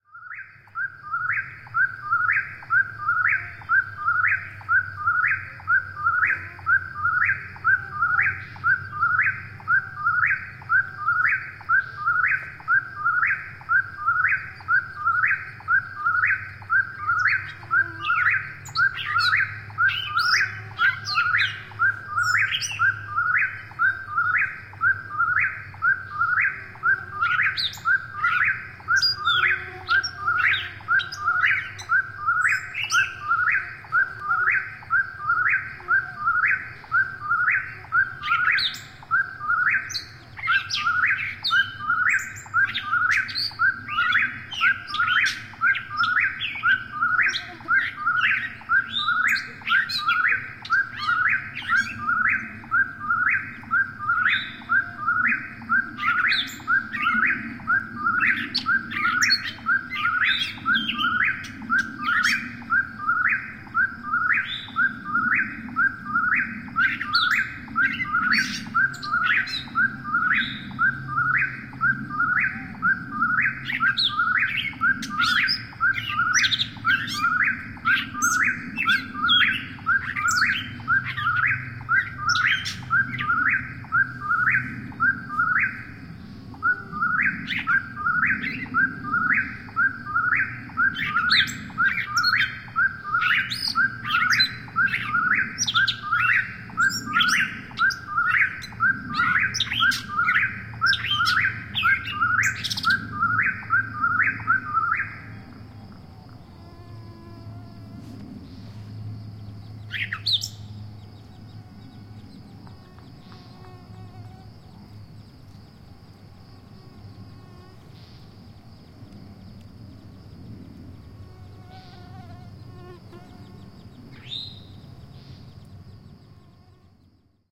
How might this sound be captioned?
Eastern Whip-poor-will Desert Natural Area 28 May 2016
Eastern Whip-poor-will, recorded 28 May 2016 in Desert Natural Area, Marlborough, Middlesex Co, Massachusetts, USA. Also heard: Gray Catbird, Eastern Towhee, American Woodcock, traffic noise, aircraft, etc.
Recorder: Fostex FR-2LE CF
Microphones: AT4022 X2 housed in a Stereo Ambient Sampling System (quasi-binaural recording)
Accessories: DSLR frame, SASS, monitoring headphones
soundscape, Eastern-whip-poor-will, field-recording, natural, bird-song, ambient-sound